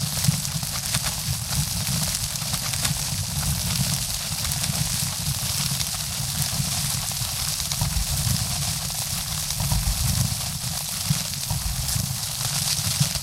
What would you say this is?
rain loop made from stickytape tinfoil
Sounds like rain. Made from crumpling tin foil. Should loop seamlessly. Boosting some of the lower frequencies (around 80 - 100 Hz) should add some thunder for you.